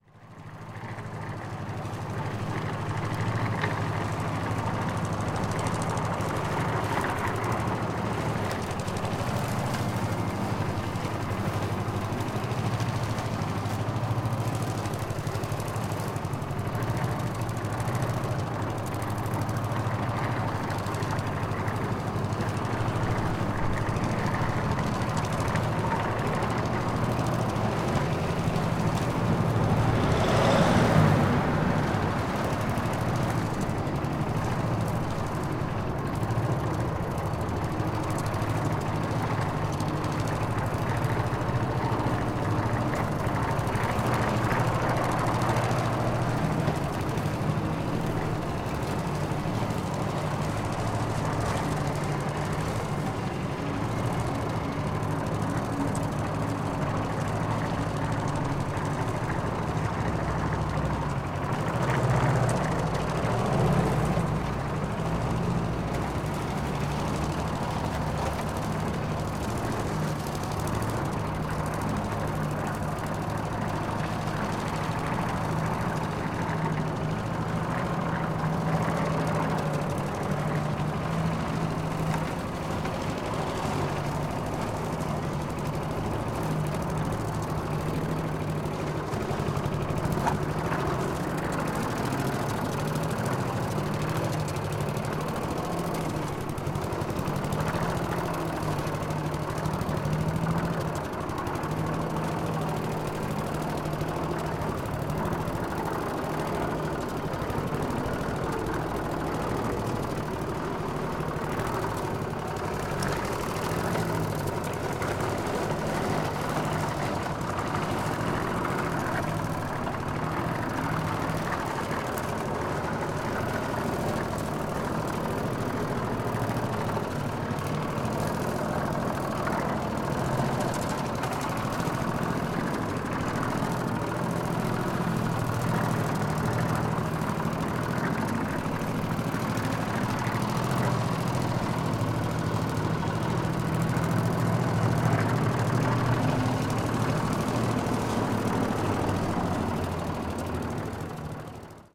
Grasmaaier Desteldonkdorp
Someone mowing their front lawn with an old gas driven lawnmower.
This recording was made with a Sanken CS3-e shotgun mic on a Roland R-26 in the afternoon of 14th of July 2014 in Desteldonk (Ghent). Editing was done in Reaper. No compression has been applied.